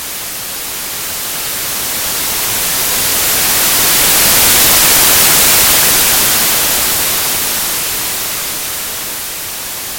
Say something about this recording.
Precise whitewave 10sec
A white wave sound. No volume increase for the first half second, then ramping up in a slight curve to full volume at 4.5 seconds and ramping back down from 5.5 seconds to mirror the start.
ambience, ambient, artificial-wave, atmosphere, effects, electronic-wave, fx, hiss, hissing, noise, sound, wave, wave-sound, white-noise